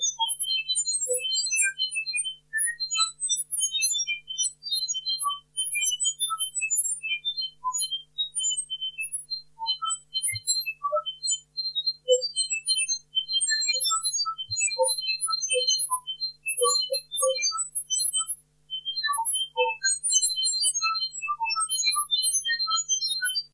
Lo-fi sparkle
I've made this sound with my broken old microphone and then edited it in Audacity.
bleep, bleeps, blip, blips, blop, computing, distorted, electronic, glitch, high-pitched, lo-fi, noise, robotic, sparkle